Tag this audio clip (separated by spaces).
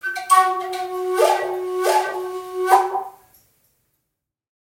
aboriginal ethnic first-nations flute indian indigenous native north-american woodwind